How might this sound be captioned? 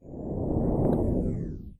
Sound of a car passing by on the street.
Created using a recording of me accidentally blowing in the mic and processed with Audacity.
drive, street, motor, roll, passing-by, driving, car, city, pass-by